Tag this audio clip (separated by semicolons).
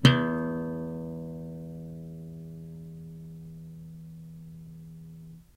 note; guitar; acoustic; scale; small